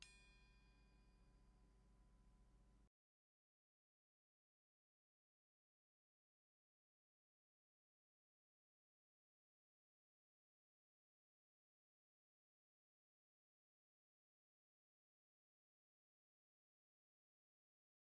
Heatsink Large - 10 - Audio - Audio 10
Various samples of a large and small heatsink being hit. Some computer noise and appended silences (due to a batch export).
ring, bell, hit, heatsink